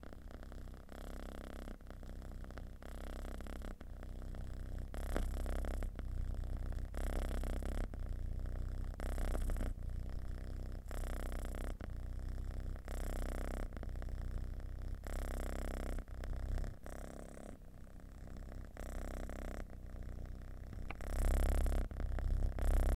Cat purring
My four month old kitten purring
pet, cat, feline, animal, purring, kitty, purr, kitten